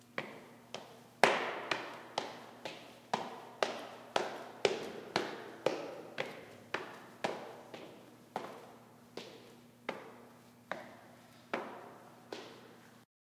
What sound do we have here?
footsteps in hall (reverb)